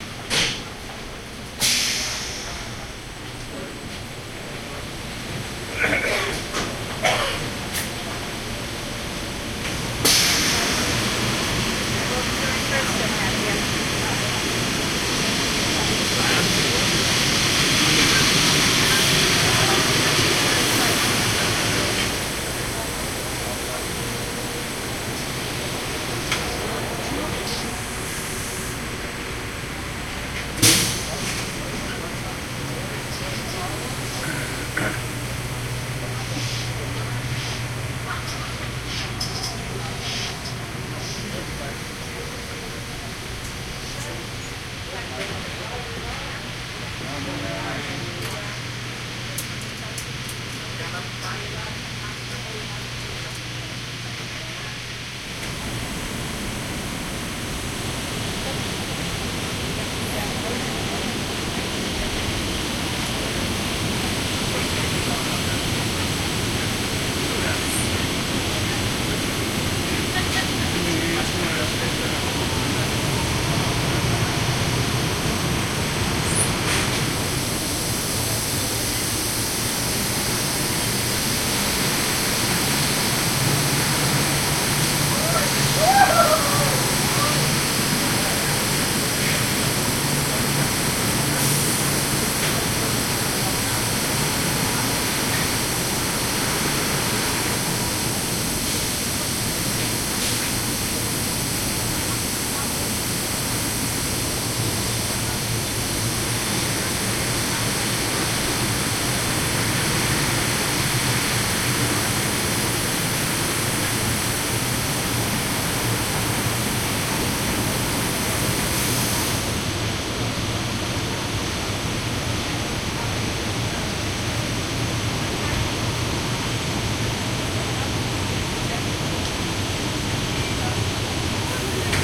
Cruiseship - inside, crew area laundry (loud machinery, low voices). No background music, no distinguishable voices. Recorded with artificial head microphones using a SLR camera.